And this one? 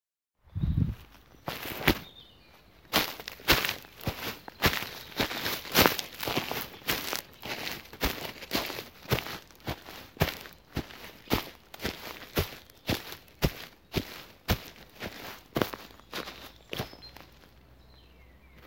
Walking on dry leaves is a forrest